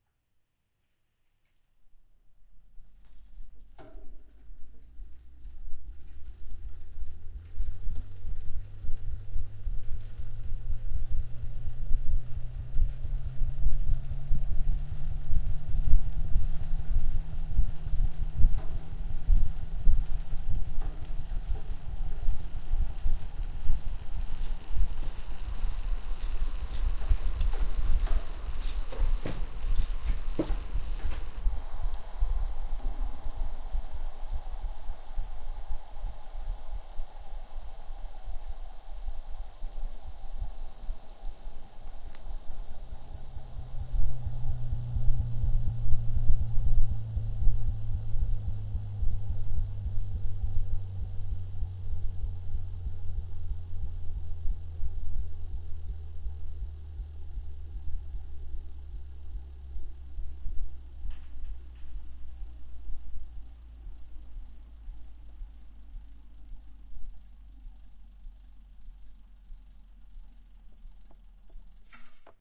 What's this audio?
Road Bike, Wheel, Spin, LFE, Hi Speed
The sound of a road bike wheel being cranked at high speed whilst clasped off the ground
Bike, LFE, Spin, Transport, Vehicle, Wheel